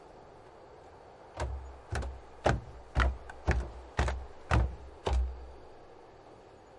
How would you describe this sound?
Walking on wooden platform near the seaside version 1
Sound of a person walking on a wooden platform. Ambient sounds which also can be heard are the ocean and crickets in the background.
Recorded on the Zoom F4 and Rode M5's
walking, footsteps, walk